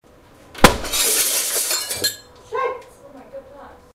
A glass door shatters and breaks
An internal back glass door shattered when the front door was opened and a gust of wind entered.
breaks, fall, smash, crash, glass, door, broken, breaking, falling, shatters